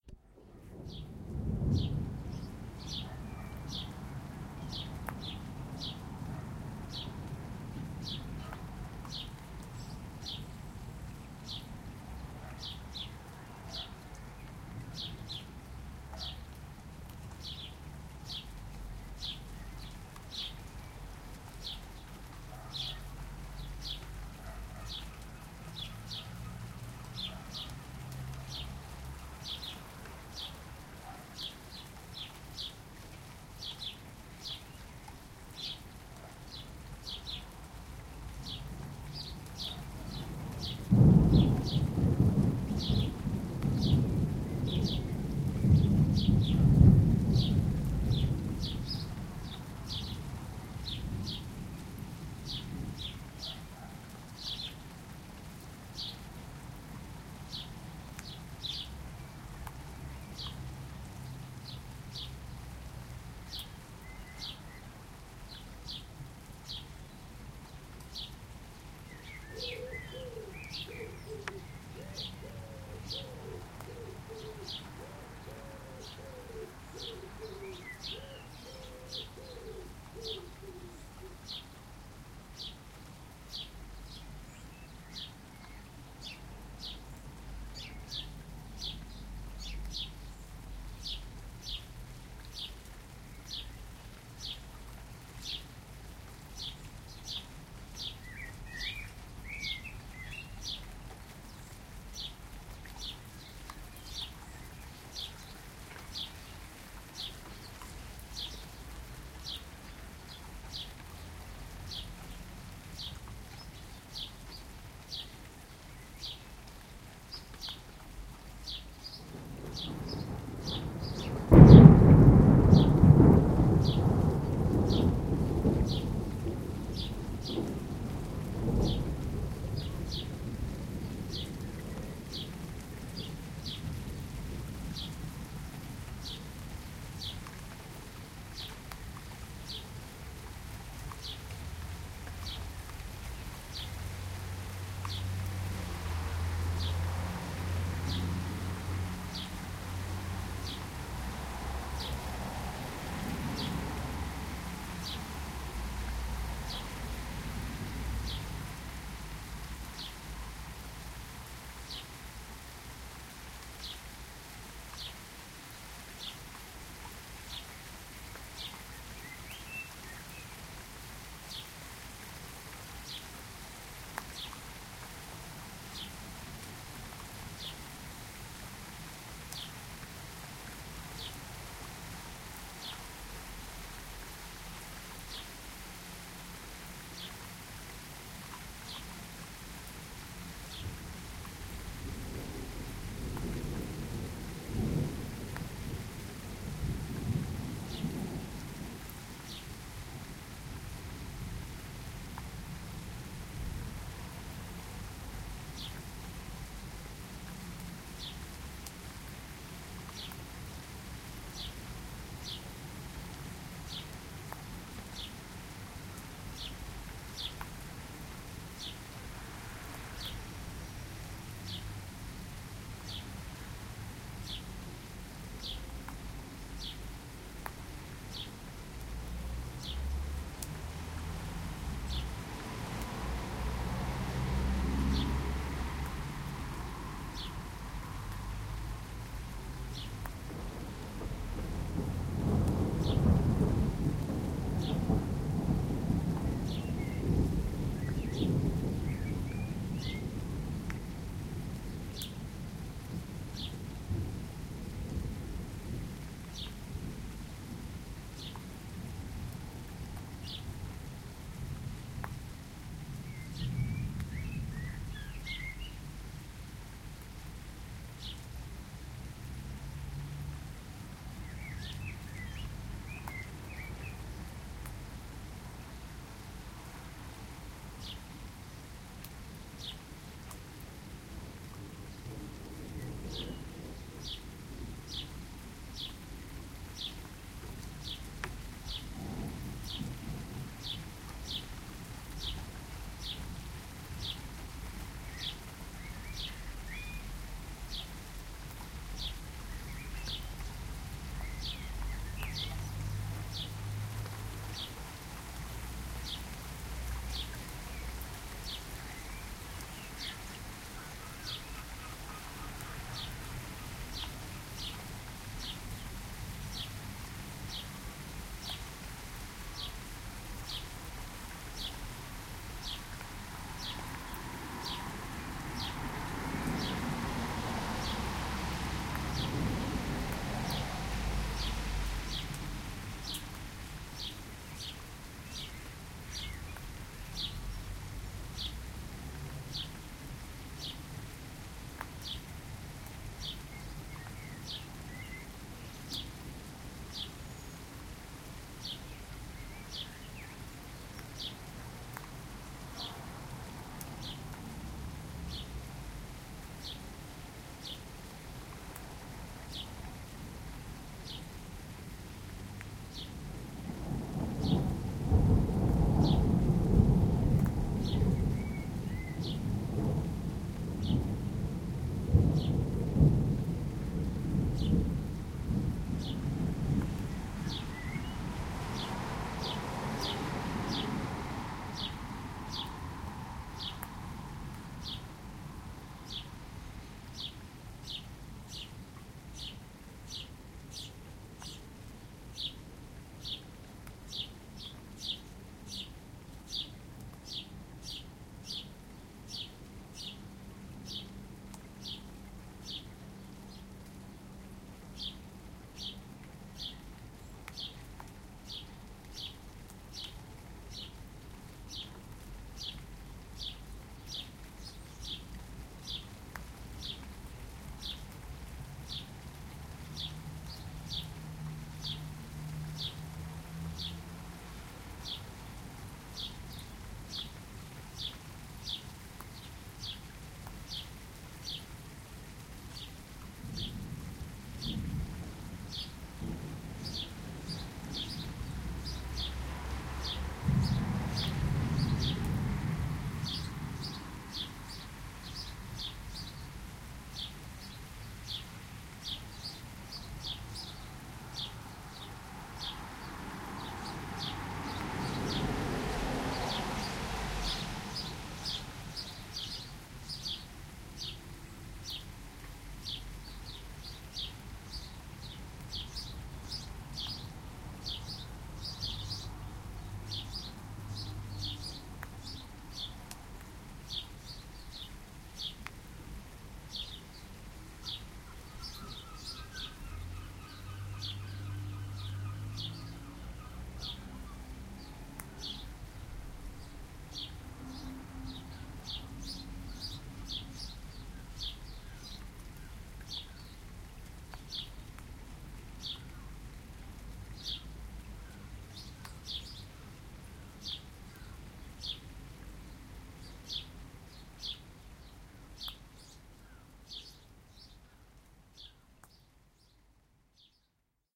July thunderstorm in a suburb of Inverness. You can hear rain, birdsong, occasional passing car and rumbling thunders. The thunder gets very rumbly and bassy and I like it like that.